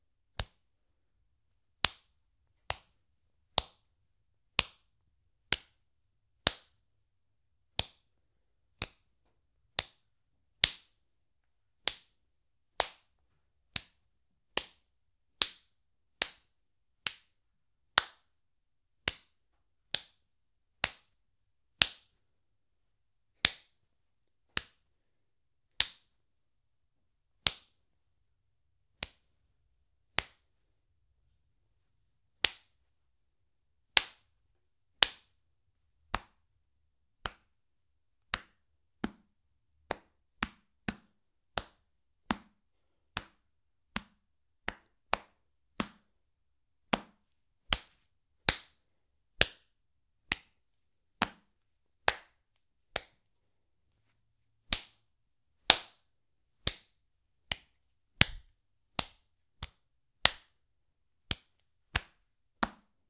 Close Combat Punches Face Stomach
Close combat punches to the face and stomach. Not the thick Hollywood kind, but definitely the real deal. Let's just say that the foley artists may or may not have been harmed in the making of this sound.
combat, fight, fighting, foley, fx, hit, punches, sfx, sound, soundeffects, soundfx, studio